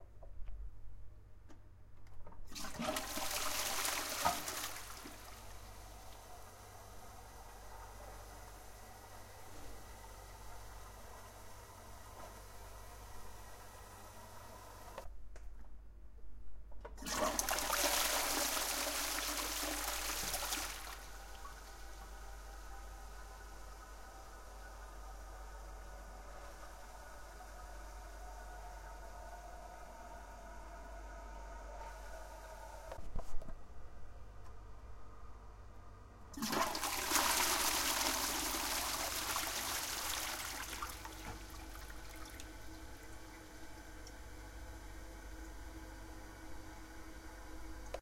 INT TOILET FLUSH X3
Recorded in office wc. 3 little different sound devices in one room. in stereo )
flush, toilet